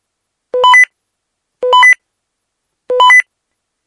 Walkie Talkie
The roger over and out noise of a walkie-talkie
affirm, beep, click, confirm, over-and-out, roger